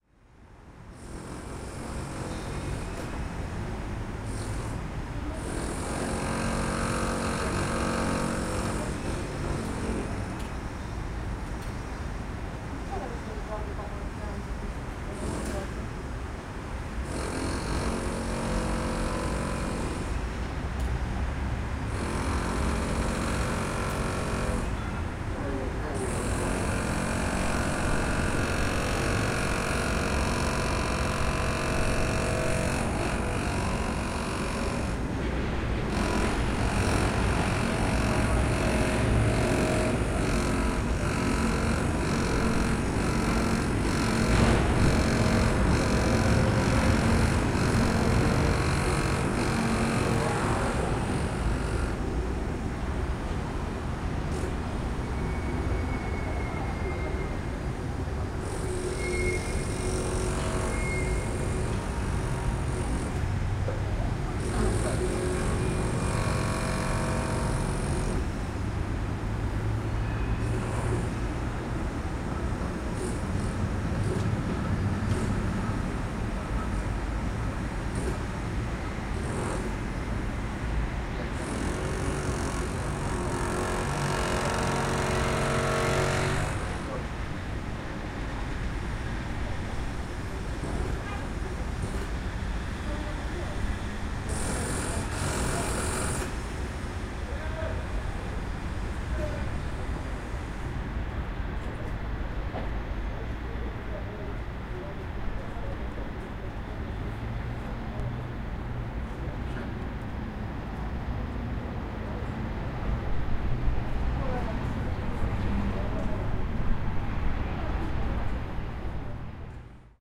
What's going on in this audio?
03.11.11: about 14.40. In front of the Cultural Center Castle in Poznan, Sw. Marcin street. General ambience: sound of the traffic, passing by trams and cars, noise made by workers renovating the castle.